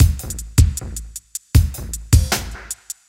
Drumloop 04 78bpm
Roots onedrop Jungle Reggae Rasta
Jungle, Roots